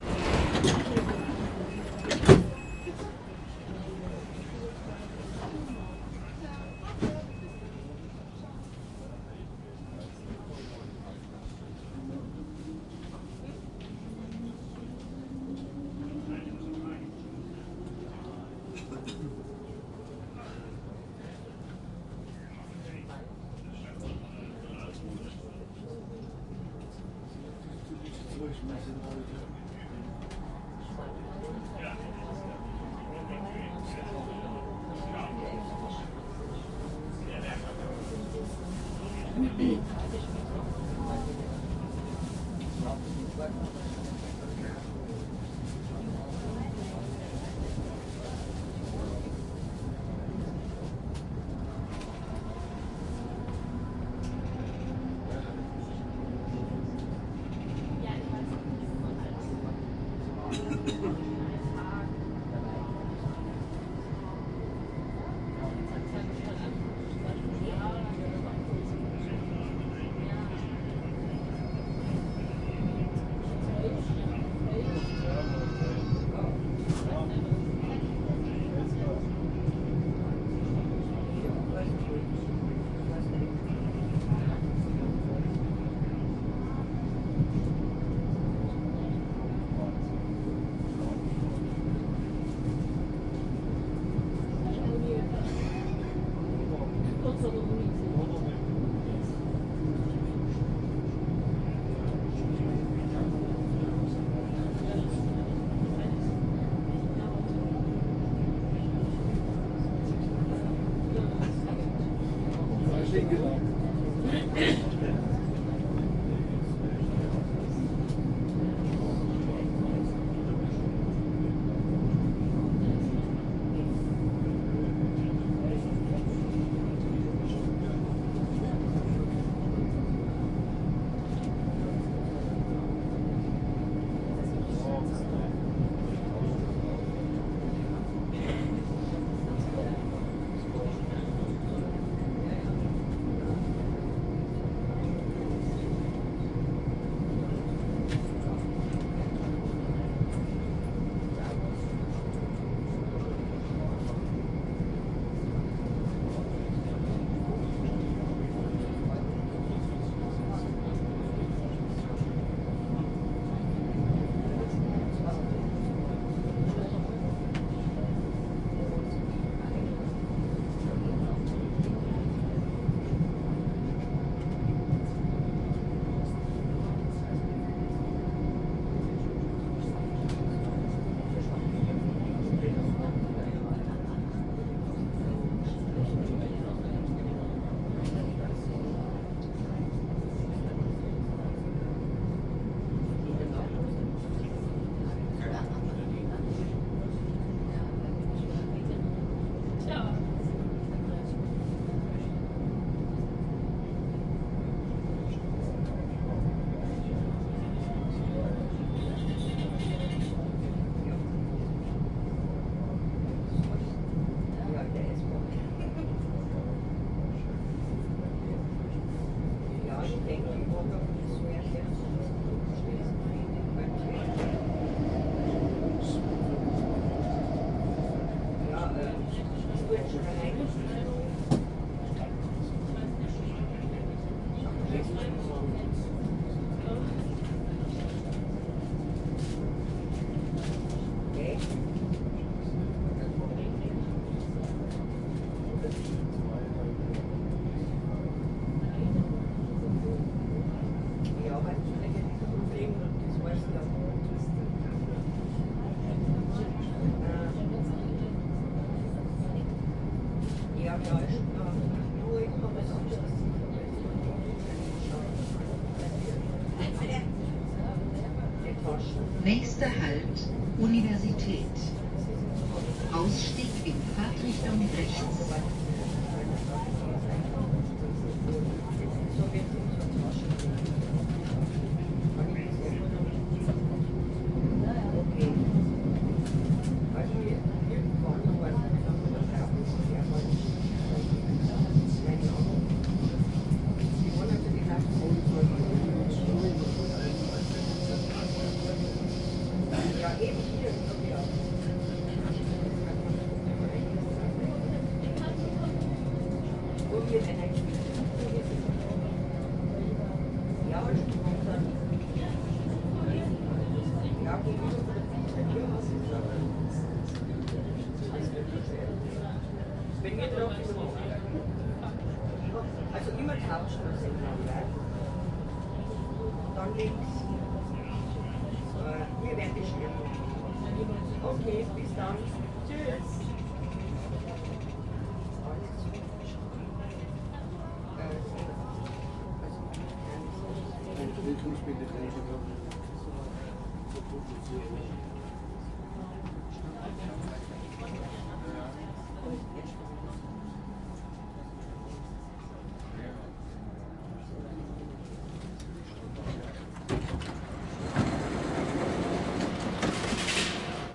inside train between two Stations
Interior stereo field-recording, inside a german "S-Bahn" between two underground stations in Stuttgart, Germany. Door close and open, some hum. Announcement at 4:29.
announcement, doors, hum, inside, interior, ride, stuttgart, subway, train, underground